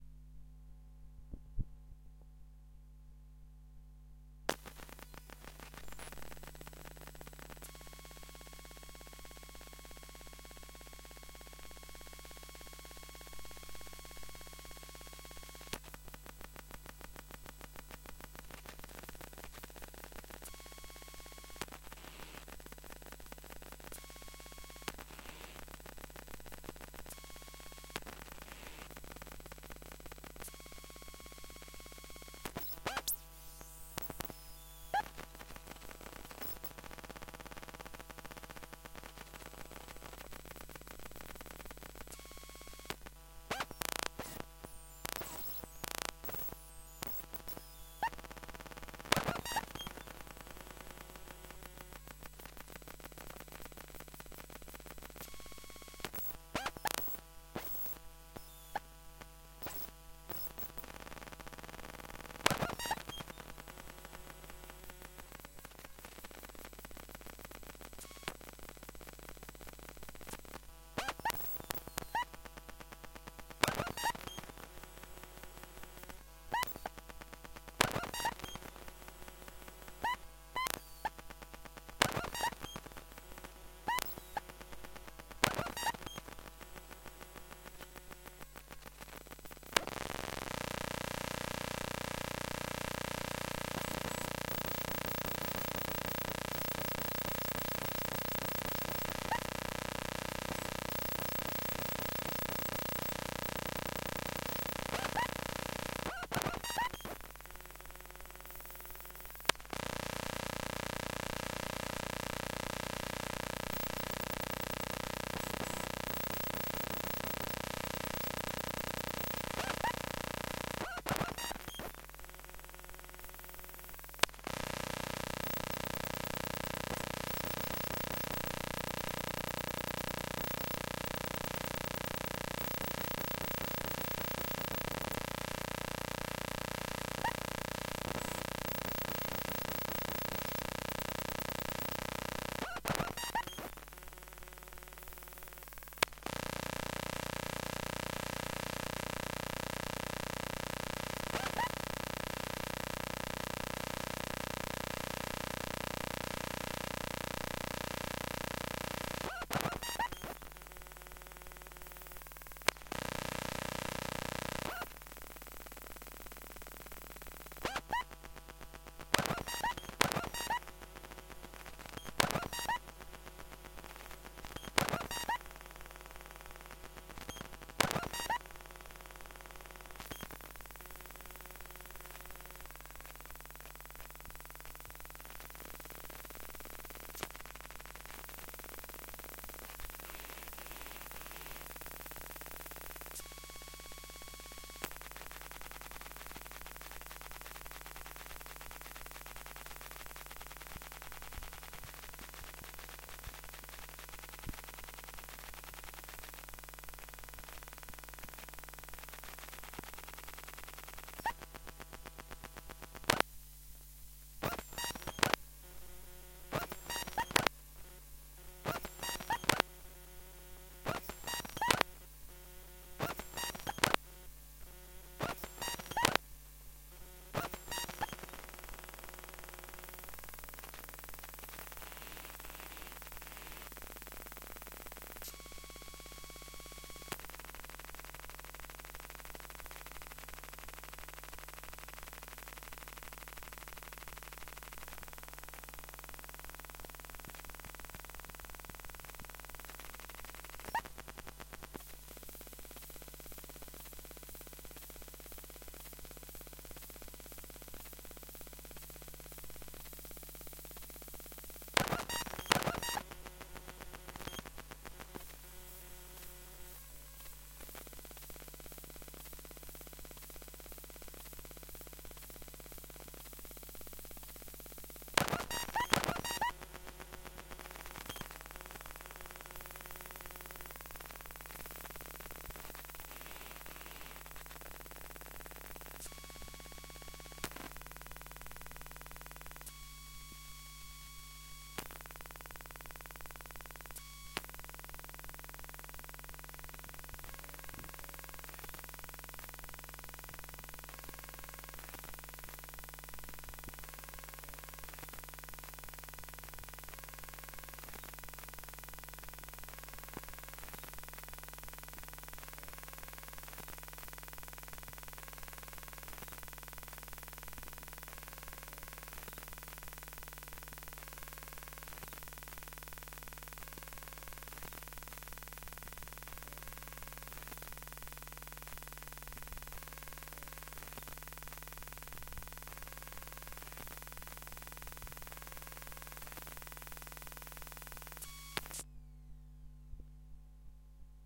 DSLR Camera
electronic, experimental, sound-enigma, sound-trip